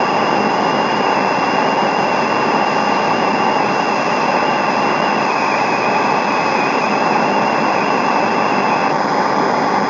AM Radio Noise
noise, radio, recorder